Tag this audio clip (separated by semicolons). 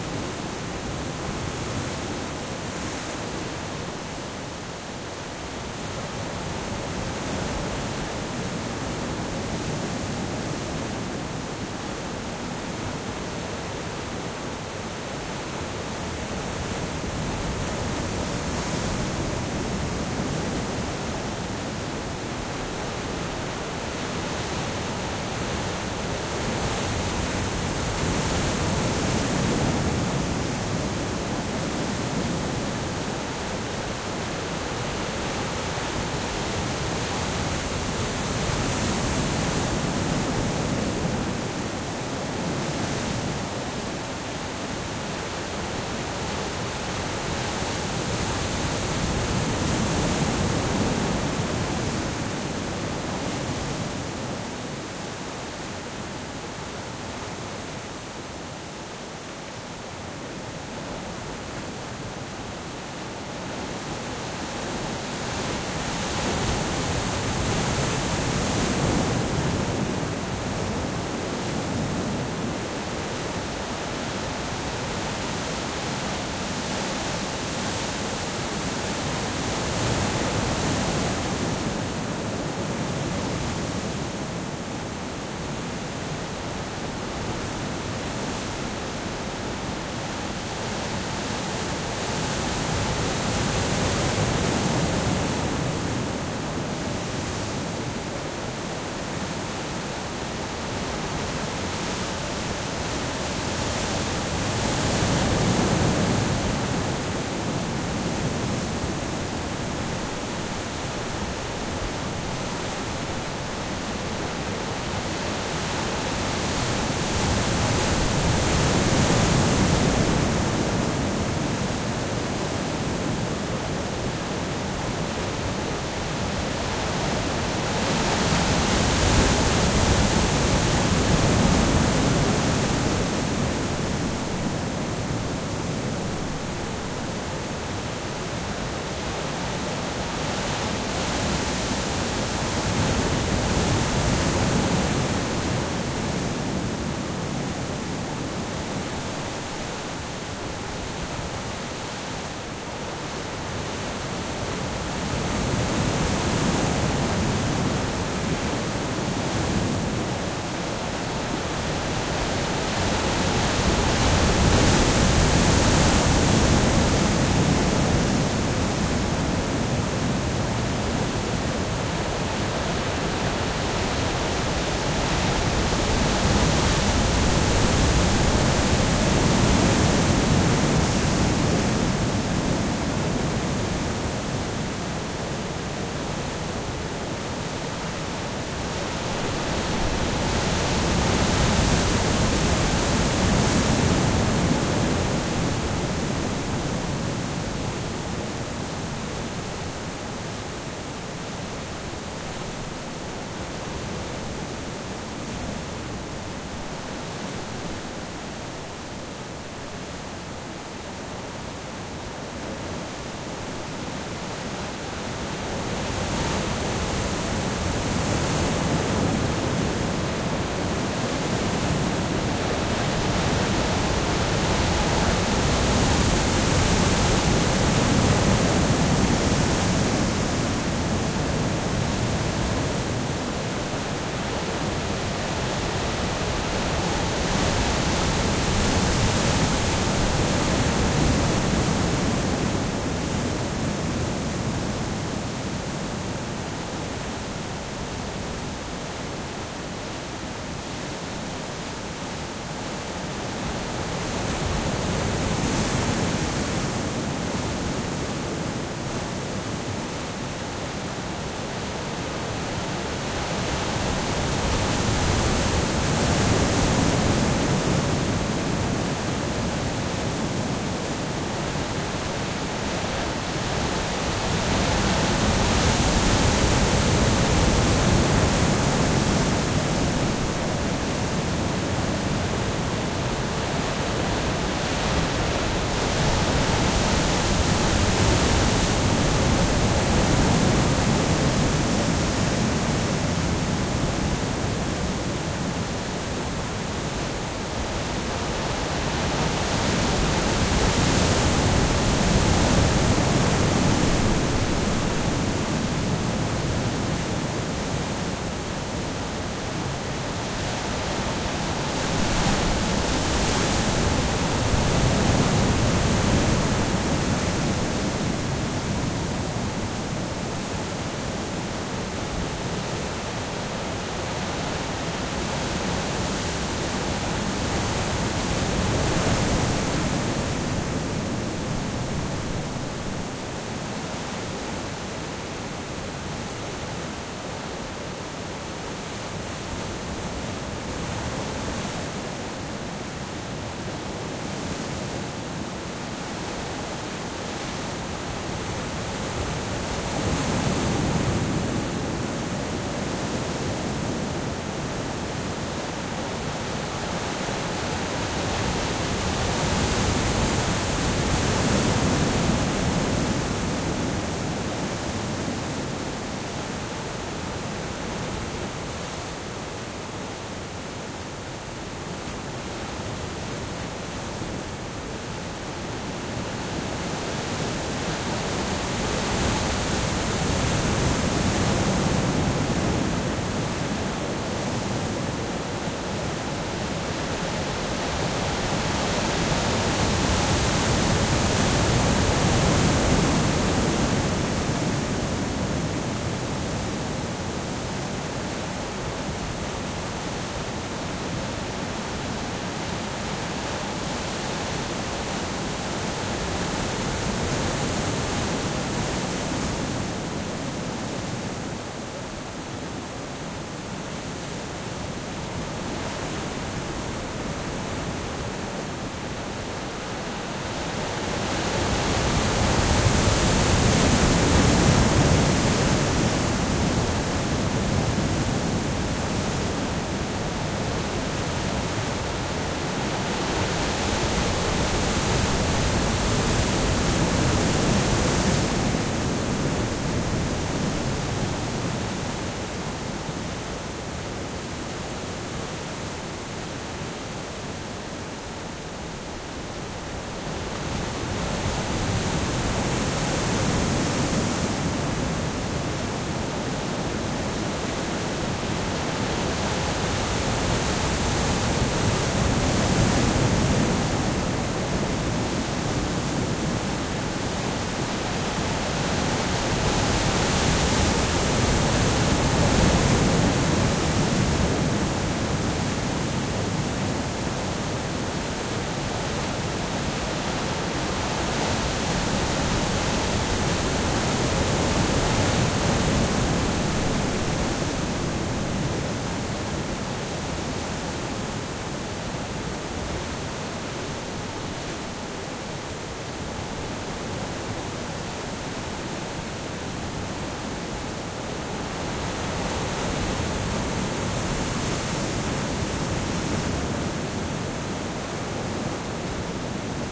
coast; heavy; ocean; shore; wave; waves